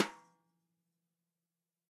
Drums Hit With Whisk
Drums, Hit, Whisk, With